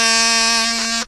Multisamples of a green plastic kazoo in front of a cheap Radio Shack clipon condenser. Load into your sampler and kazoo the night away! DO high
free, kazoo, multisample, sample, sound